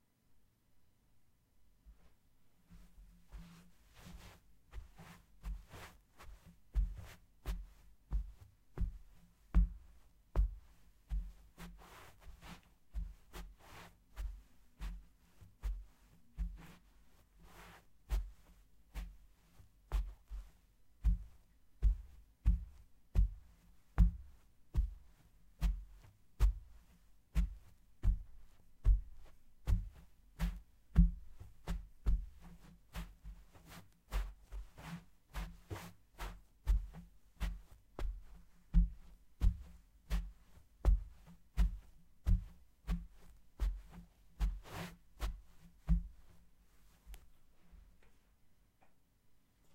carpet footsteps
Socks on carpet
carpet, rustle, walking, socks, footstep